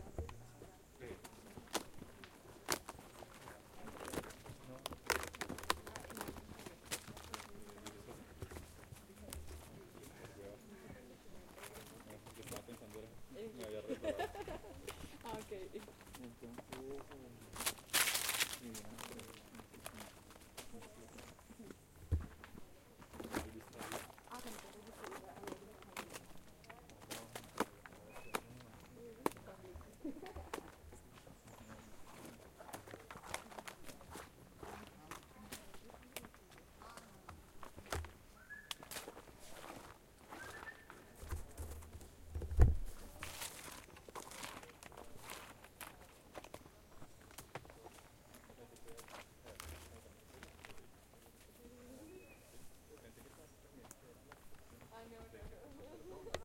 Quebrada La Vieja - Tronco deshaciéndose y voces de caminantes
Grabación en la Quebrada La Vieja Bogotá -Colombia
Sonidos de un tronco deshaciéndose en el agua y voces de caminantes a las 08:33 a.m.
Field recording from river La Vieja Bogotá - Colombia
A trunk falling apart into the water and pedestrians voices at 08:33 a.m